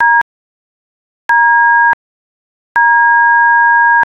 The 'D' key on a telephone keypad.